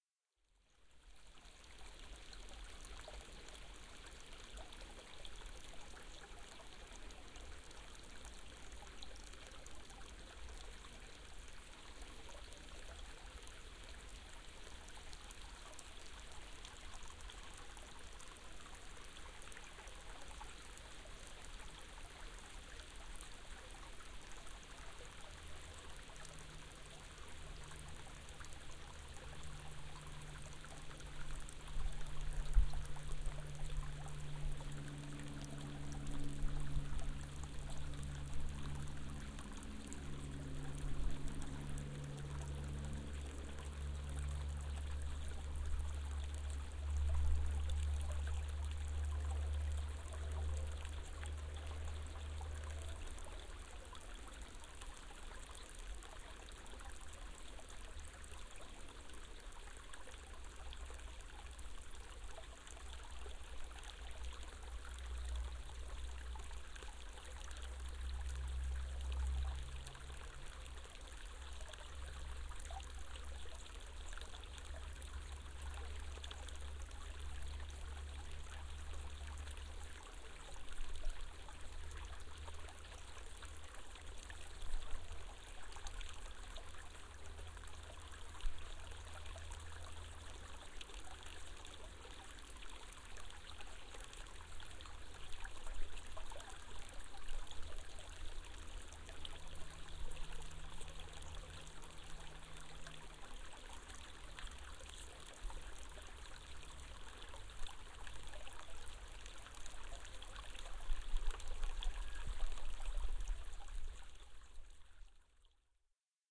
Forest Stream between Mekhanizatorov Settlement and Akhtyrka vilage, Moscow region. 11 Oct. 2021